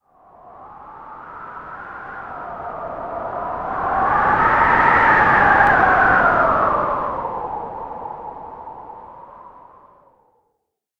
gust of wind
WIND MOVING FROM LEFT TO RIGHT
left, gust, right